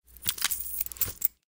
Weapons GunHolster 001wav
The sound of a small pistol or gun being holstered into a belt with a lot of metal accoutrements.
clothing, gear, gun, guns, holster, jangle, jingle, latch, metal, pistol, unholster, weapon, weapons